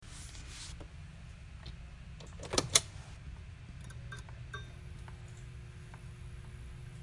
Desk Lamp Switch On
Desk lamp chain being pulled and buzz of light flickering on.
light, office, switch